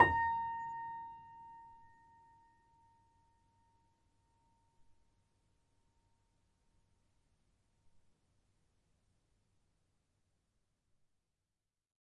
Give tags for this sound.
piano
multisample
upright
choiseul

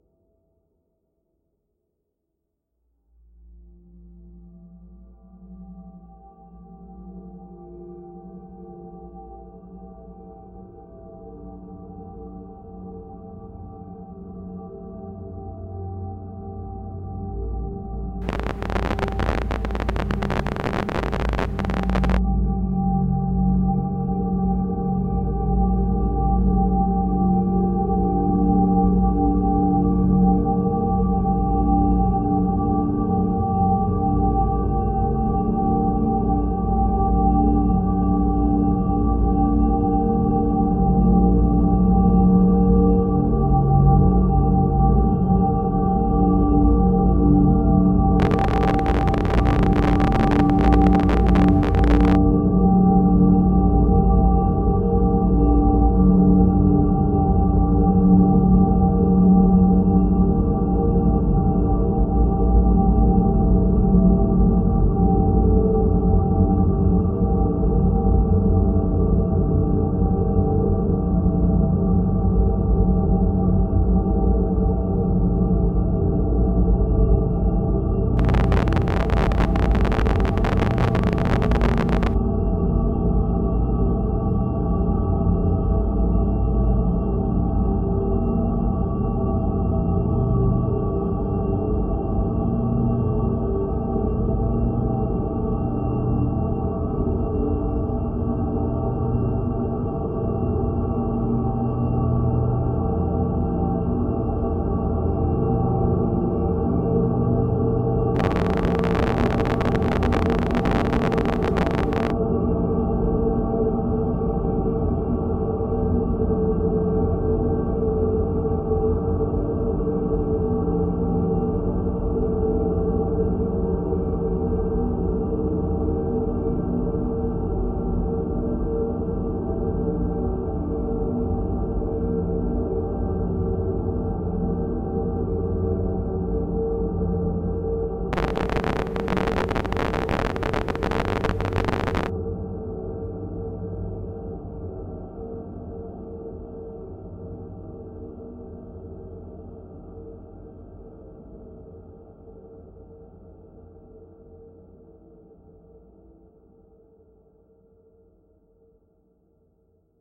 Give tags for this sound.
artificial
drone
evolving
experimental
pad
space

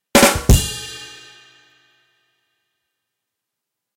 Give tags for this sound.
badum-tss
drum
drums
joke
percussion

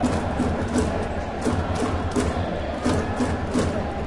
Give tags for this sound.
Baseball
Soundscape
Crowd
Ambient

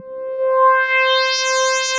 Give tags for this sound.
multisample
resonance
sweep
synth

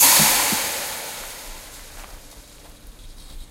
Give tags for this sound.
fence
hit
metal
tail

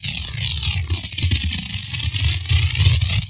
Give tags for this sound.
Aliens
Phaser
Warp
Game-Creation
Spaceship
Space
Outer
Hyperdrive

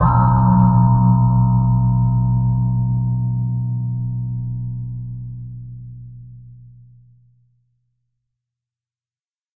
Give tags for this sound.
a
deep
low

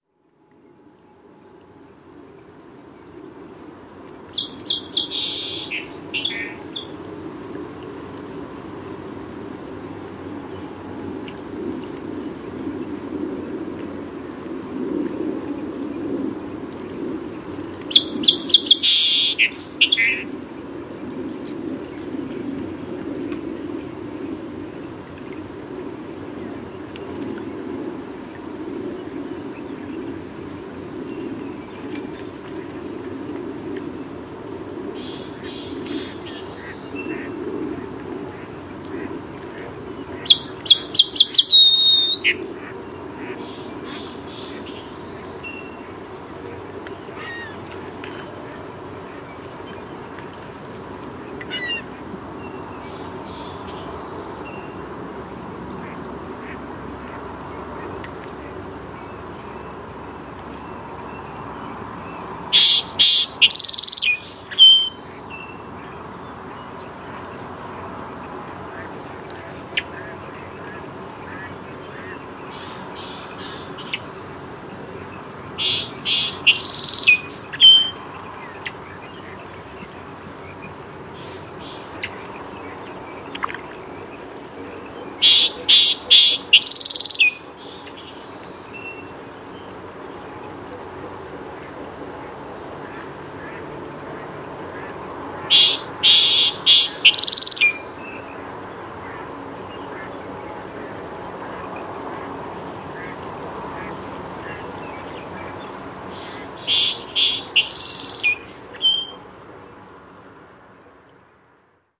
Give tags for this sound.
sherman-island
melospiza-melodia

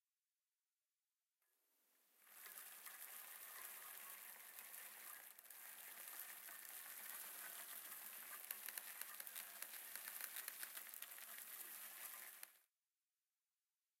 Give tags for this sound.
downhill
terrestrial
jump
bicycle
rider
bike
ride
park
whirr
street
click
pedaling
freewheel
wheel
approach
chain

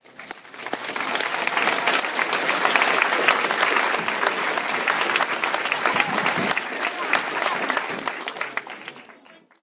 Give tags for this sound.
clap
applause

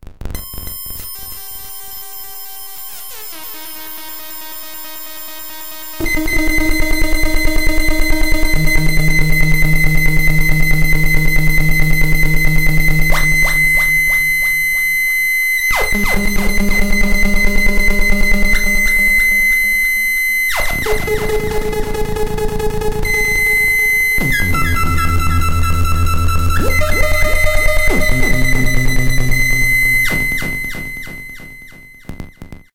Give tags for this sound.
laser
space
laboratory
space-war
digital
annoying
alien
robot
modulation
sci-fi
drone
damage
experiment
computer
blast
electric
signal
sweep
ambeint
random
experimental
electronic
sound-design

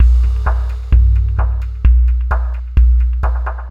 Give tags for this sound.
130
beat
bpm
dance
drum-loop
electro
electronic
loop
mic-noise
techno

